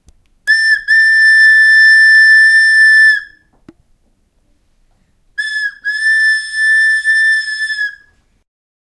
Train whistle me with a flute.